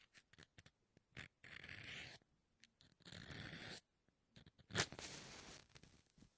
Strike a Match /škrtnutie zápalkou
Strike a Match
// Recording device: Zoom H2N //